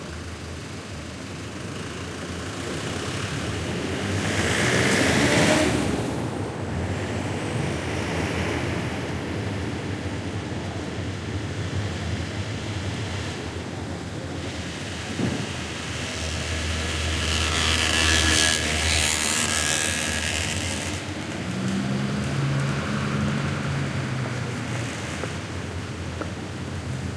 Passing car and bike. Recorded in Seville during the filming of the documentary 'El caracol y el laberinto' (The Snail and the labyrinth), directed by Wilson Osorio for Minimal Films. Shure WL183 capsules, Fel preamp, Olympus LS10 recorder.
ambiance, city, field-recording, traffic